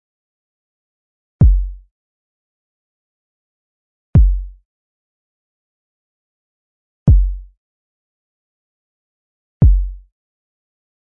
Kick Drum F#
Basic kick drum
Basic, Drum, Kick, one, sample, shot